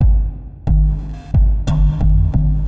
Irridesen drums
ambient, glitch, idm, irene, irried, jeffrey, spaces